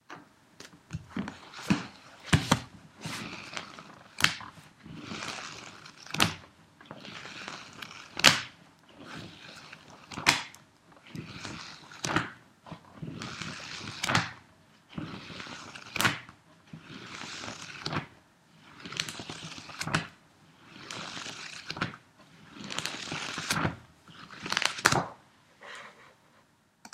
sound from opening and closing a book
sound from a book opening and closing